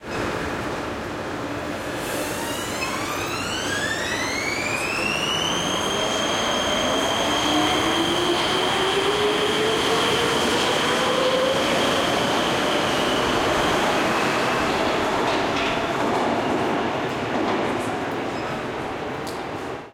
15 metro line C departure
Train departures the metro station, line C, Prague.
subway, metro, Czech, Prague, station, Panska, underground, CZ, train